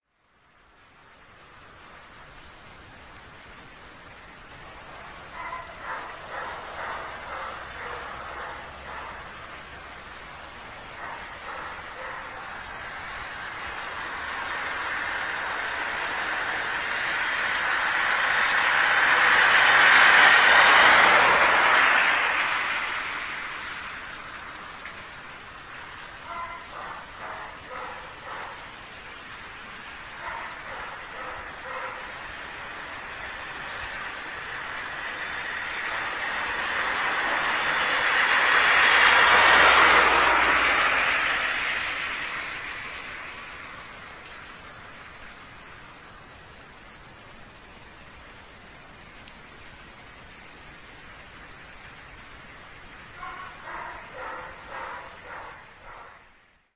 08.09.09: about 20.00; Tuesday in Sobieszów (one of the Jelenia Góra district, Lower Silesia/Poland); gen. Józefa Bema street in front of an old poultry farm; sounds of the crickets and passing by cars